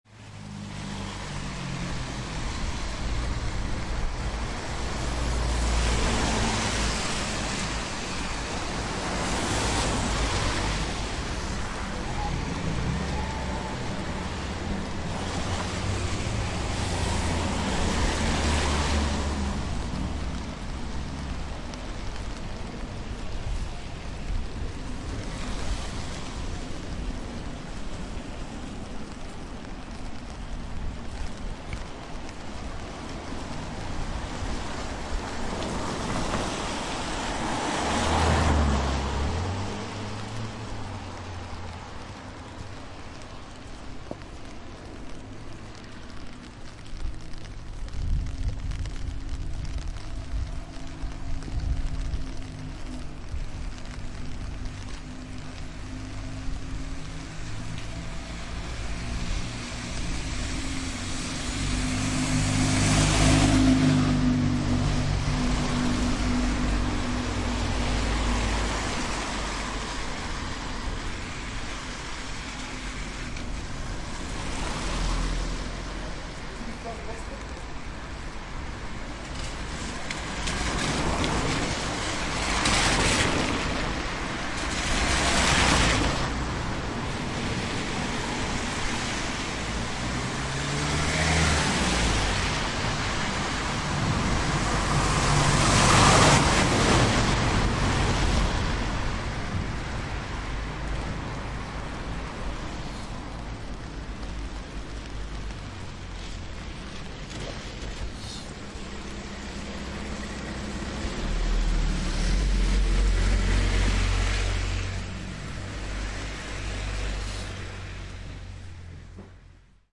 Traffic on a wet road.
Recorded on a London street...
road, traffic, city, rain, ambience, winter, field-recording, street, cars, car